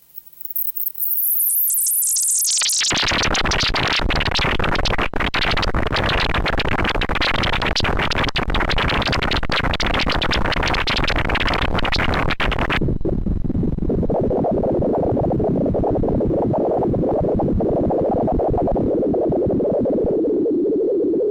under water mic turbulenceB
A submerged mic in a turbulent stream rolling over rocks and pebbles, like a camera lost in a river. Synth generated.